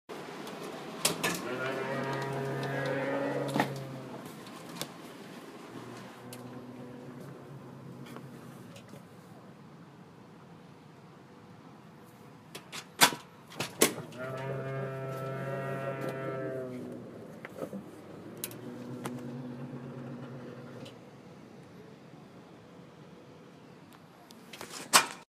A creaking door makes a mooing sound when opened.
cow, creaking, door, moo, sound, squeaking